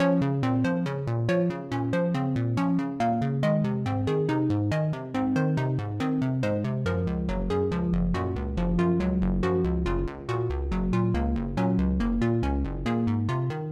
8bit-harmony-lowcutoff-envelope
An 8 bit inspired loop.
8-bit, chip, chipsound, chip-tune, chiptune, cut, electro, house, lo-fi, low, off, vintage